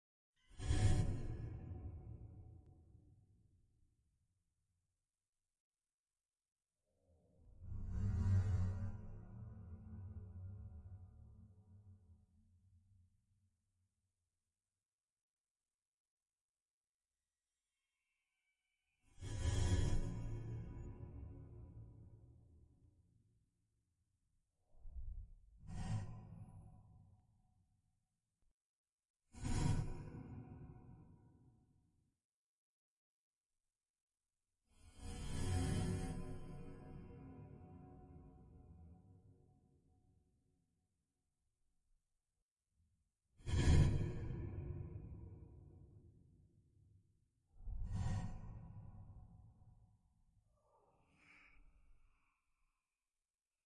Ambient Horror Noises
Scary background noises, whooshing sounds. This was done using a cabinet door slamming, mixed with Audacity
Halloween, sinister